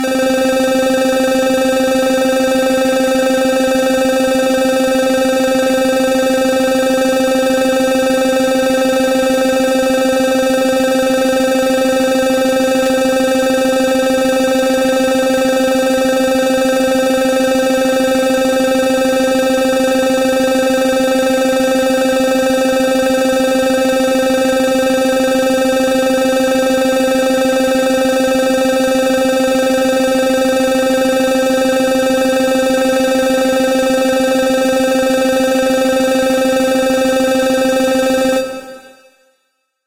8 Bit Arp
A chiptune lead arp I made with GMS in FL Studio Mobile.
8-bit, Arp, game, analog, chiptune, synthesizer, lead, synth, retro